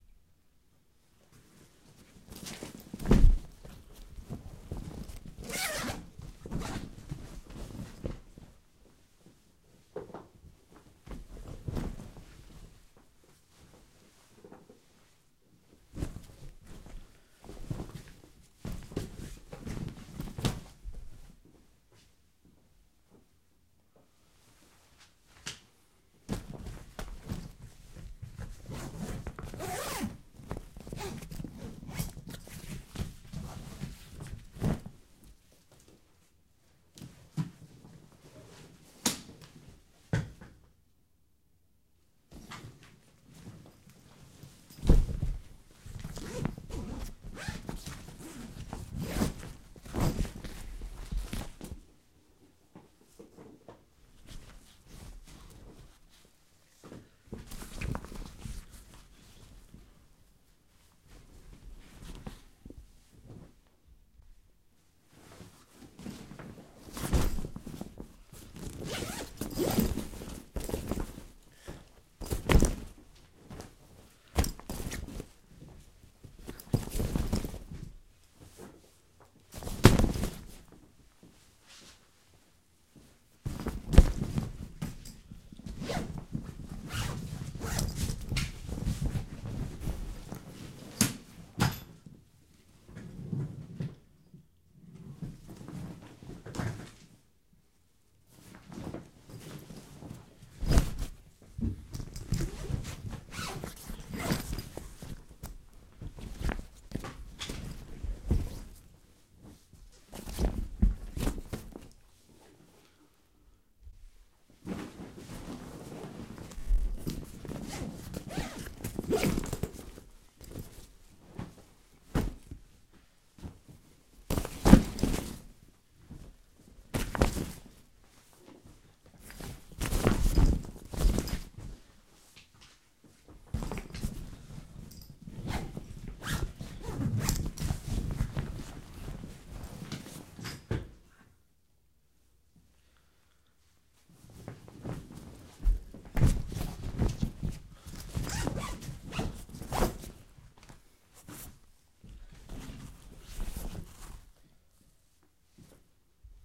secuencia de hacer la maleta. make the suitcase secuence
clothes, maleta, meter, metiendo, ropa, secuencia, suitcase